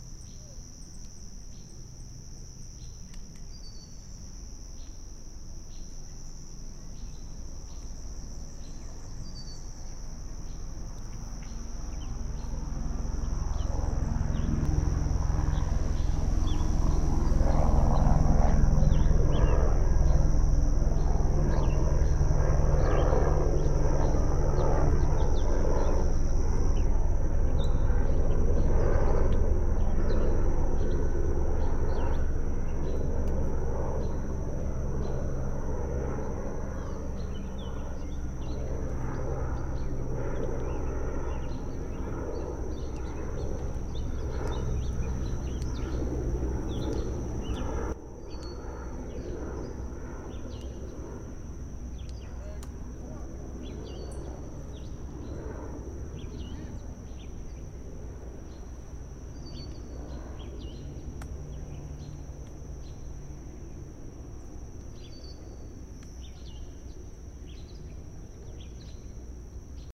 recording of a distant helicopter flyby on a lakeside setting.